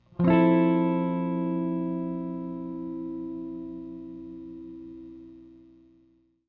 A chord played on a Squire Jaguar guitar. I'm not good at guitar so I forget what chord.